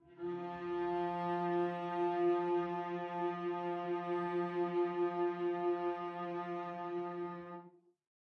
One-shot from Versilian Studios Chamber Orchestra 2: Community Edition sampling project.
Instrument family: Strings
Instrument: Cello Section
Articulation: vibrato sustain
Note: E#3
Midi note: 53
Midi velocity (center): 63
Microphone: 2x Rode NT1-A spaced pair, 1 Royer R-101.
Performer: Cristobal Cruz-Garcia, Addy Harris, Parker Ousley
single-note, cello-section, vibrato-sustain, multisample, strings, midi-velocity-63, midi-note-53, cello